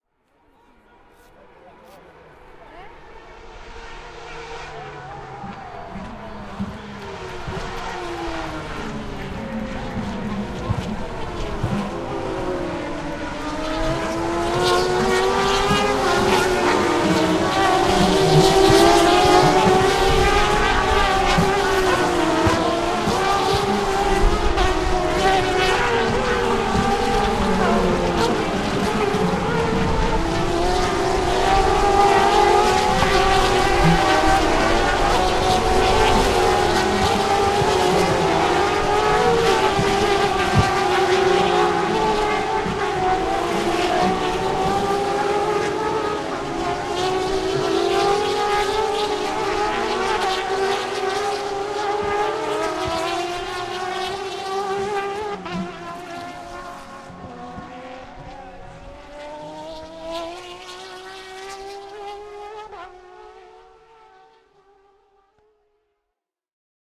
TC.Balcarce08.1turn.2
TC Race at Balcarce, Argentina. Cars came down-shifting to 1rst turn, engine exploding , and go accelerating to back-straight. Recorded with ZoomH4, LowGain
car, engine, explode, field, race, recording, zoomh4